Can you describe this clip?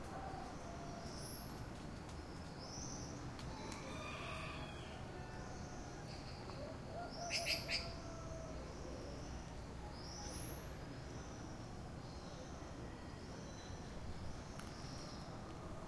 small town early morning city haze distant rooster nearby bird chirp nice echo short Saravena, Colombia 2016